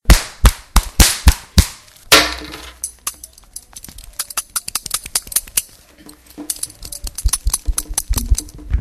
mySound LBFR Bakasso
Sounds from objects that are beloved to the participant pupils at La Binquenais the secondary school, Rennes. The source of the sounds has to be guessed.
Bakasso, Binquenais, La, belt, france, leather, metal, my, sound